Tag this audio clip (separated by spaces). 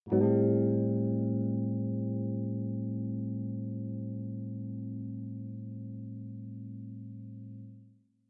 atmosphere electric guitar note riff tone transition vibrato